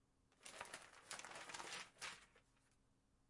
Newspaper page drop
Dropping a light newspaper to the ground.
newspaper, paper